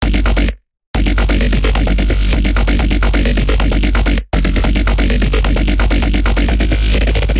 Part of my becope track, small parts, unused parts, edited and unedited parts.
A bassline made in fl studio and serum.
a low grinding and poppy talking bassline at a 1/16th beat